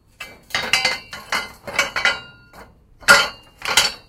Metal handling bars in container